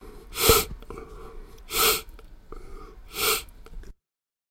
sniff cup
This is the sound of someone sniffing an empty cup.
empty, cup, sniff